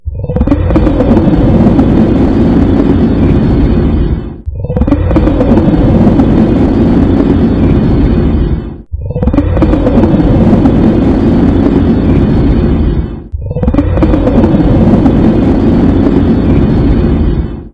lion mad
A slowed down of Asteroid's bear_mad.
This just sounds like a lion or something else growling over and over.
ROAR